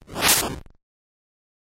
noise swoosh 6 lr
A panoramic swish.
8-bit, digital, effect, electronic, glitch, hit, lo-fi, sfx, sound-design, soundeffect, swish, swoosh, synth, synthesized, video-game, whoosh